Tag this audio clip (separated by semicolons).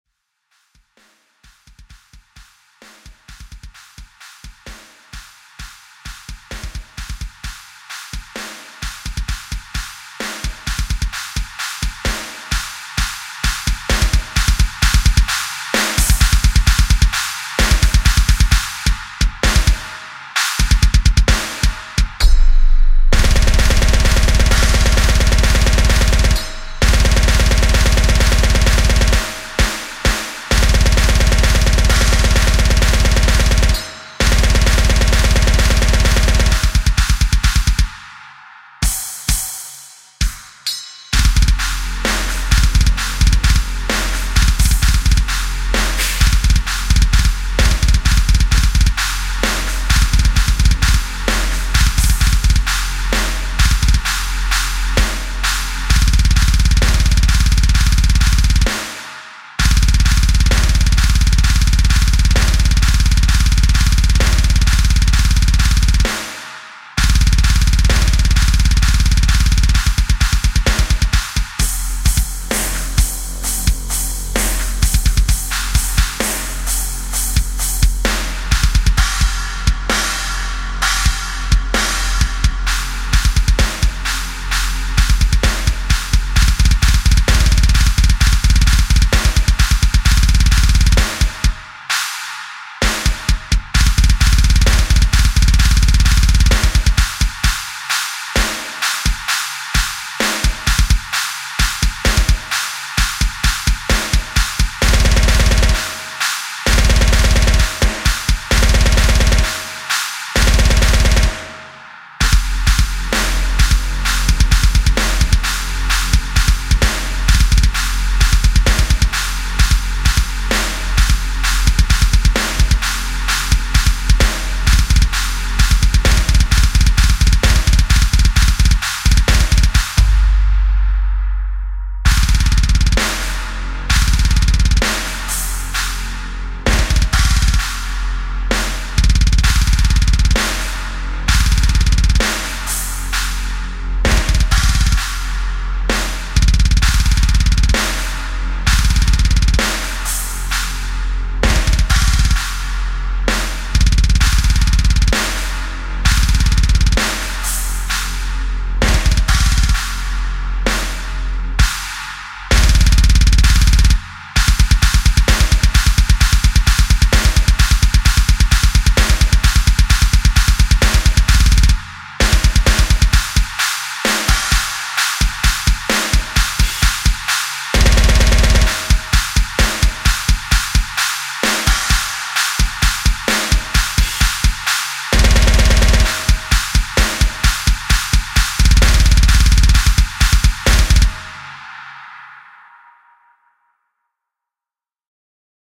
drum-loop; drums; metal